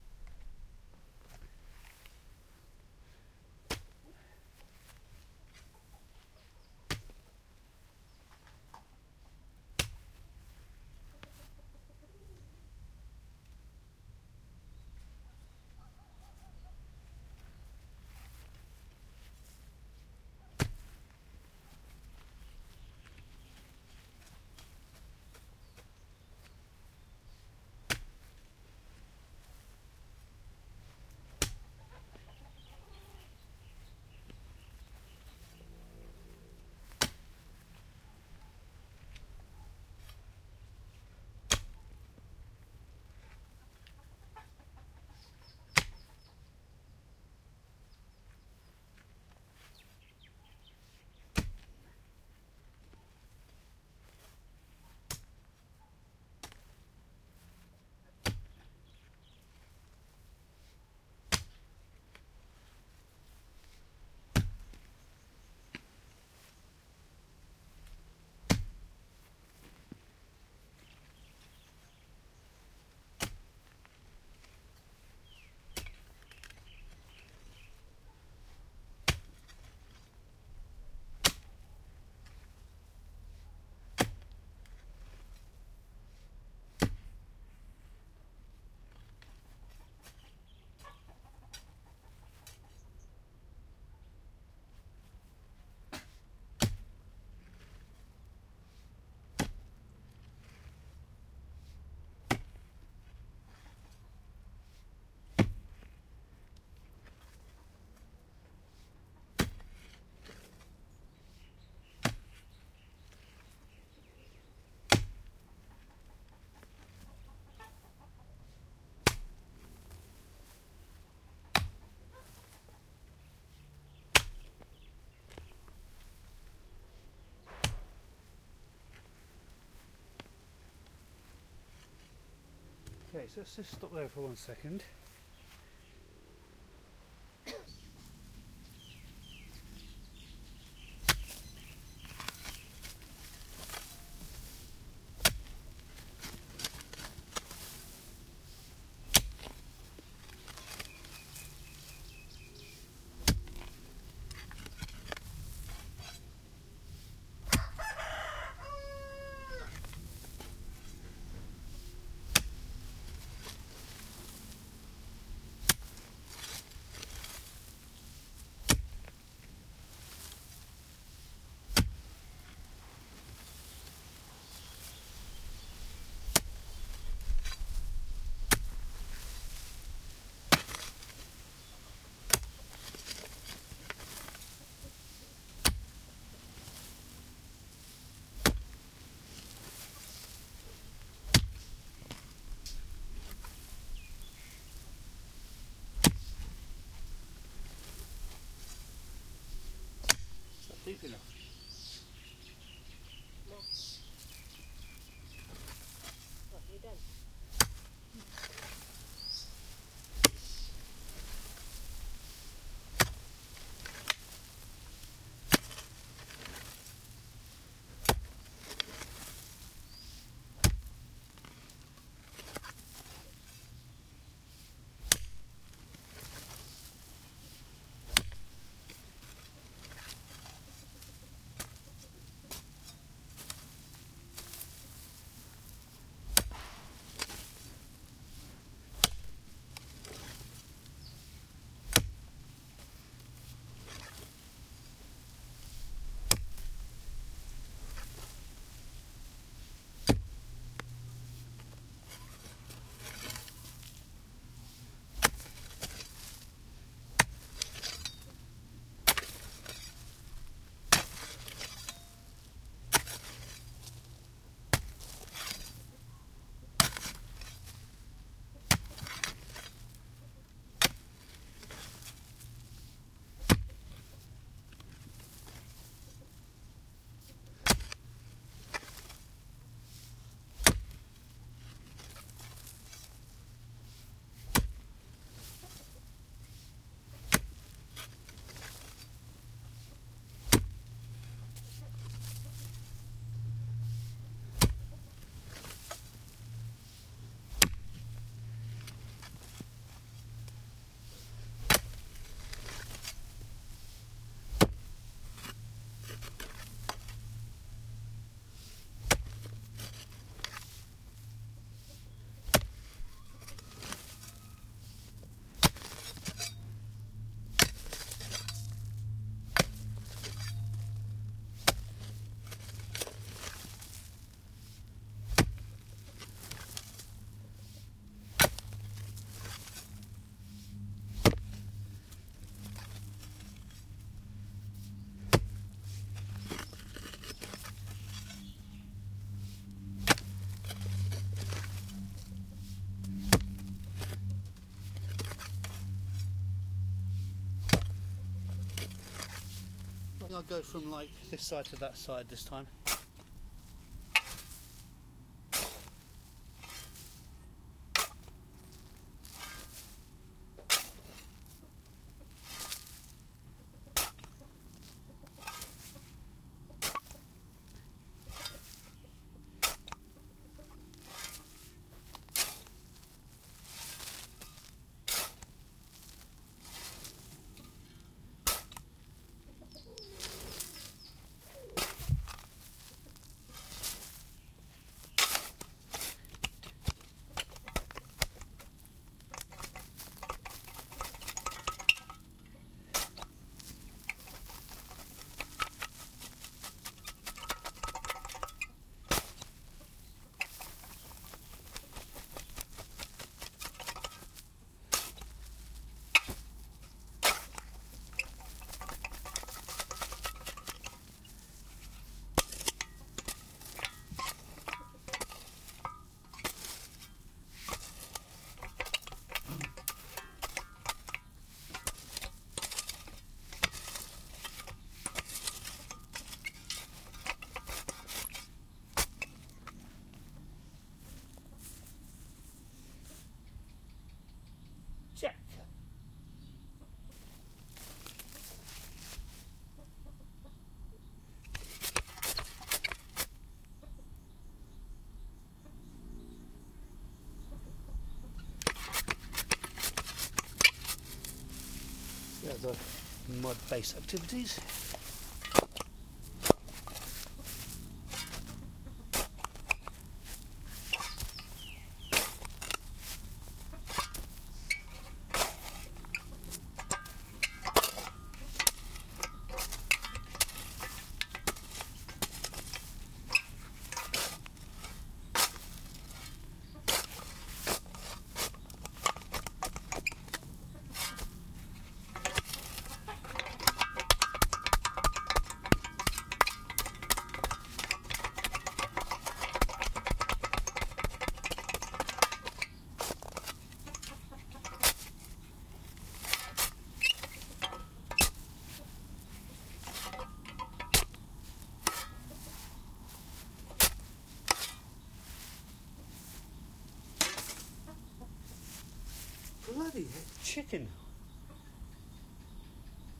Digging a hole in earth with a pick